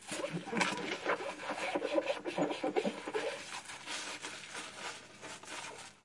dishes and soap